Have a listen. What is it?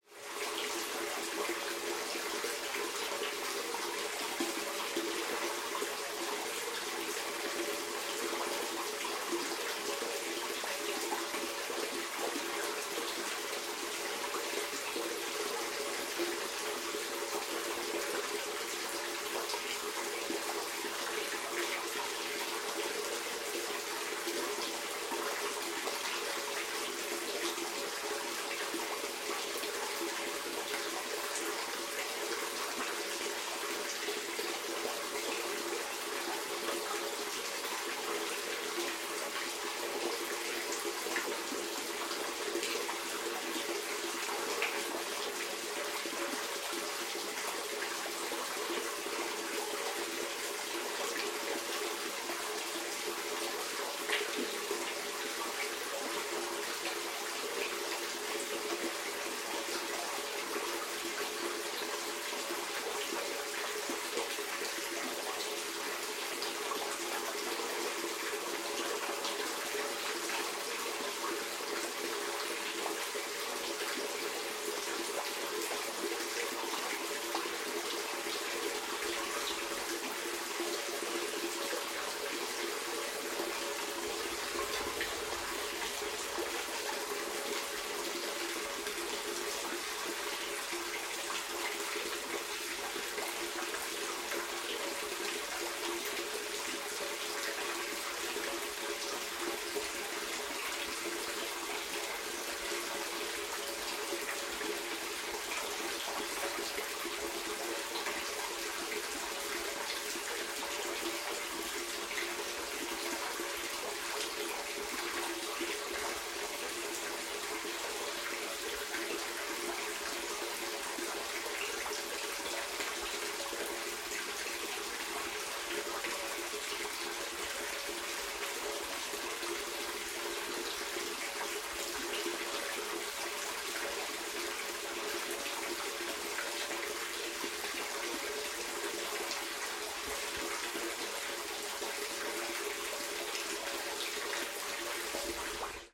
field,gutter,drain,sewage,running,water 01 MKH416
I had to go and record this drain after I went past it. The surrounding was very quite as well.
water, field-recording, melted, outside, manhole, snow, drain, flow, gutter, sewage, running